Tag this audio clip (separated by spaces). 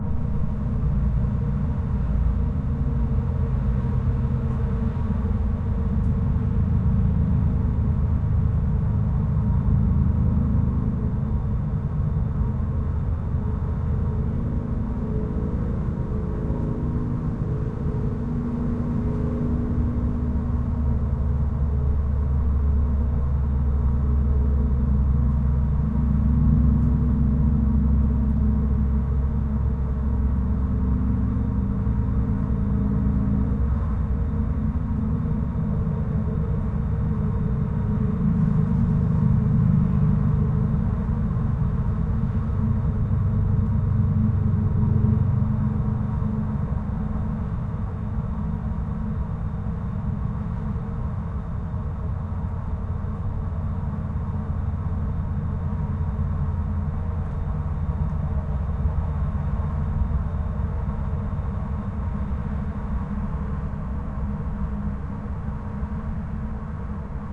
campground airy haunting ambience shit wood outhouse receptacle